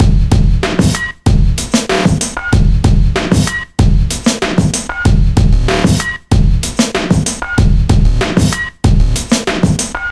VST slicex combination + dbglitch effect vst + adobe audition effect (reverb) +d